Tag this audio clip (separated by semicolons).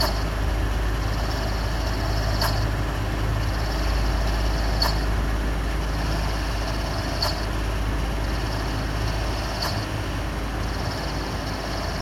ventilator; ventilation; vent; blower; fan